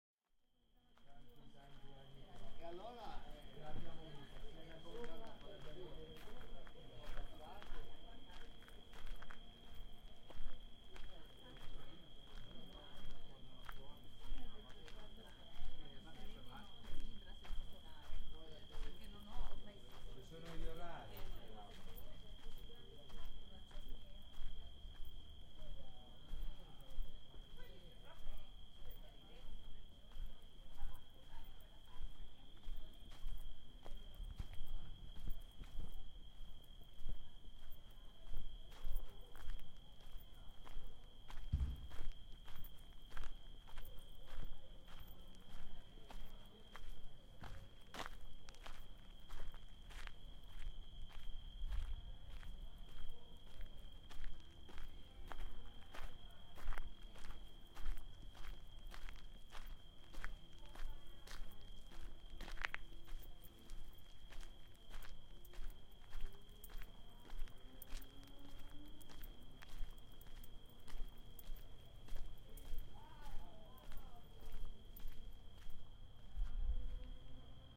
A Night in Italy
A night walk on leaves with distant voices.
Recorded with a Zoom H4, summer 2005.
field-recording, footsteps, night, voices, distant